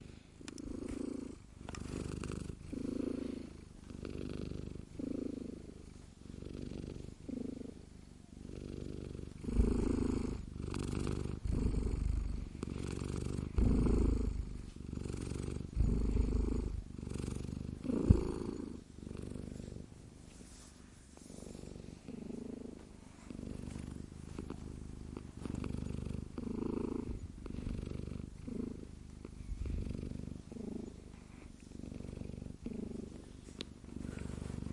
Chrissie Purr Purr
3, bass, cat, cute, feline, meow, miau, nyan, purr, purring, relaxed, sweet